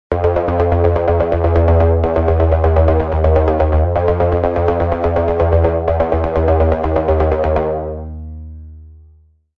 Bomber Bassline
An aggressive 1/16 synth bassline, made with zebra2.
[BPM: 100]
[Key: Chromatic Minor]